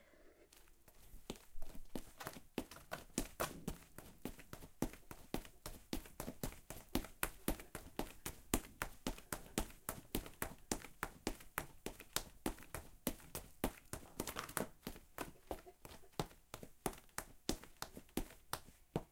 run road
running on slightly crunchy ground
run, running, steps